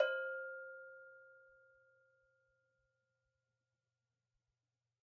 gbell 5-1 pp
recordings of 9 ghanaian double bells. Bells are arranged in rising pitch of the bottom bell (from _1 to _9); bottom bell is mared -1 and upper bell marked -2. Dynamic are indicated as pp (very soft, with soft marimba mallet) to ff (loud, with wooden stick)